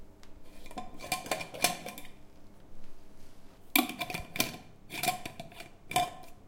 The process of unscrewing the cover of a glass pot and then screwing it back again. Recorded with a Sony PCM-D50.